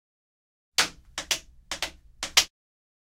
32 baile suecos
baile de zapatos madera
baile, pasos